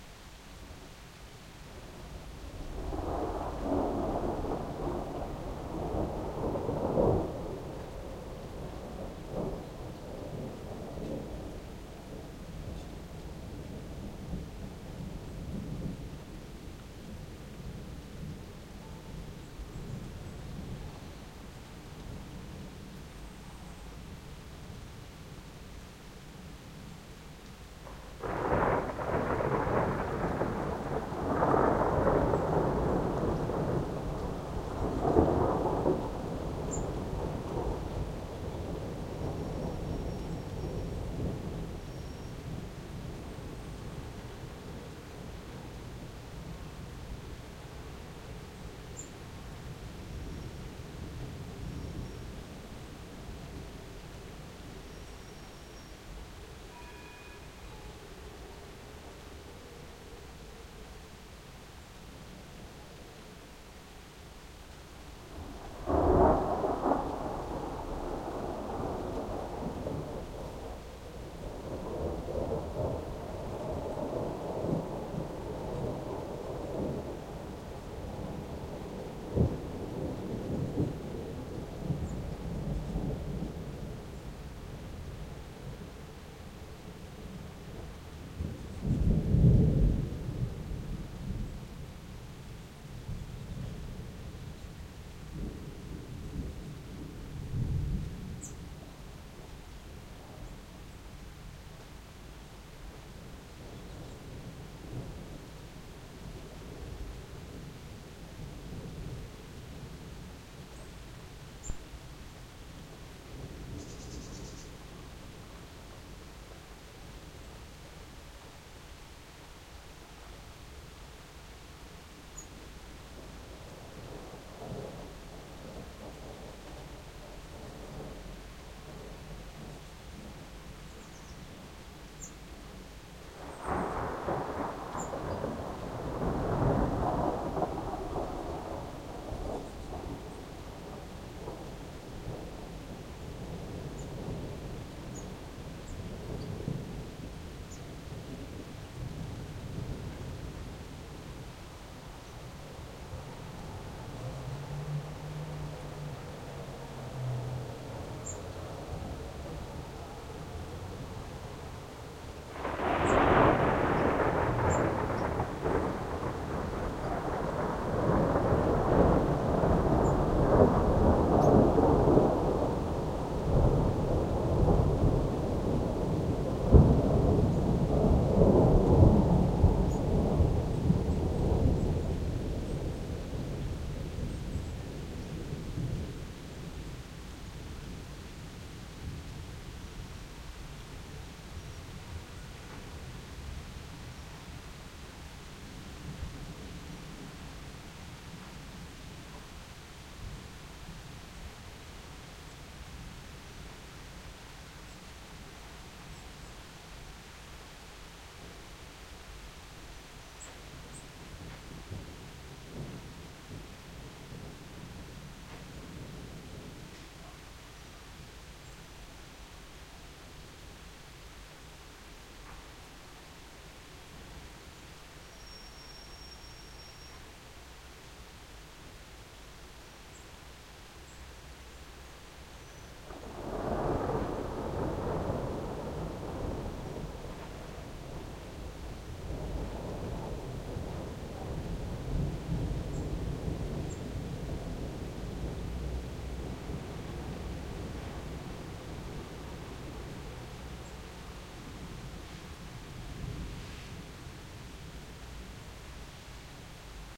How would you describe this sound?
donder37tm42
Part of the thunderstorm that passed Amsterdam in the morning of the 9Th of July 2007. Recorded with an Edirol-cs15 mic. on my balcony plugged into an Edirol R09.
thunderstorm, rain, field-recording, thunderclap, streetnoise, nature, thunder